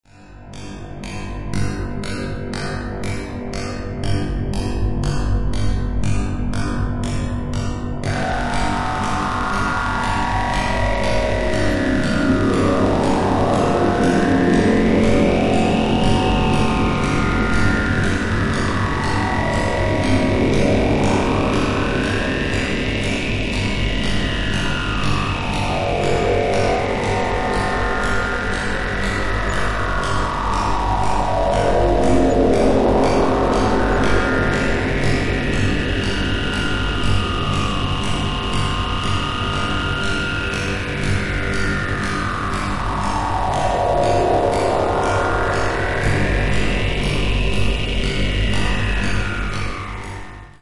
Connectivism : A Learning Theory For The Digital Age
made by supercollider
connectivism, sci-fi, sound